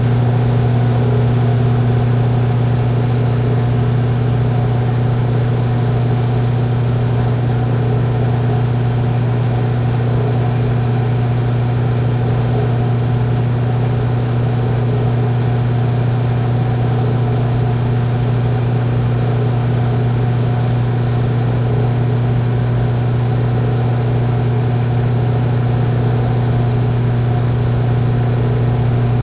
Sounds recorded while creating impulse responses with the DS-40.